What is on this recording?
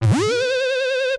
Short sound effects made with Minikorg 700s + Kenton MIDI to CV converter.